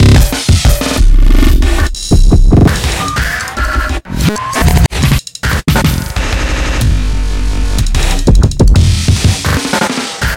BR Sequenced [converge] (2)
One of the sequenced sections of percussion, unaccompanied.
breakcore, beats, fast